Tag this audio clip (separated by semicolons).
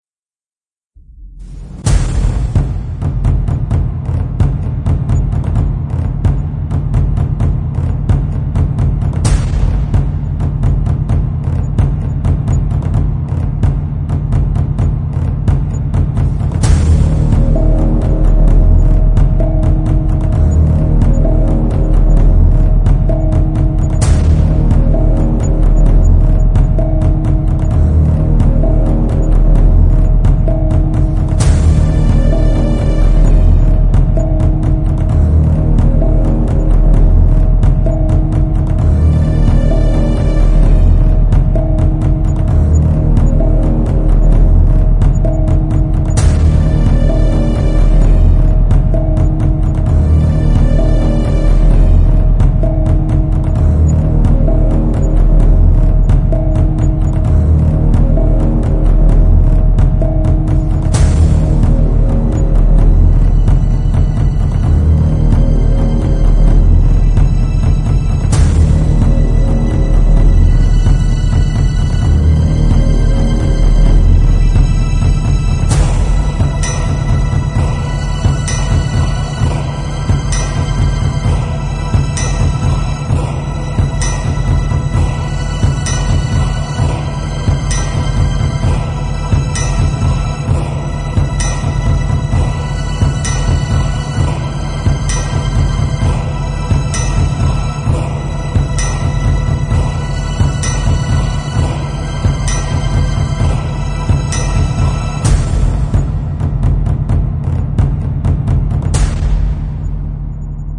battle; choir; drums; epic; game